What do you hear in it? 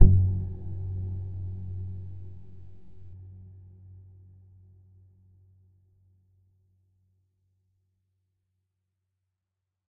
this is a g2 viola pizzicatto, transposed 2 octaves down, with 10 seconds fft reverb